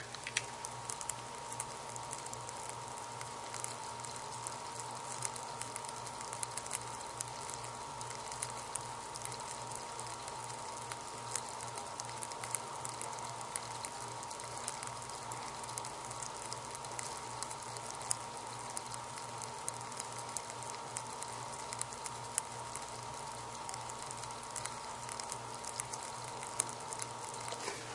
tadpoles noisyfiltered
Band pass filtered sounds of tadpoles making bubbles recorded with Olympus DS-40 with Sony ECMDS70P.
tadpoles, field-recording